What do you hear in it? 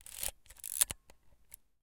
pentax me - film wind 03
Winding the film of a Pentax ME SLR camera.
pentax-me, photo, slr, camera, pentax, slr-camera, camera-click, picture, click, photography, taking-picture, manual, shutter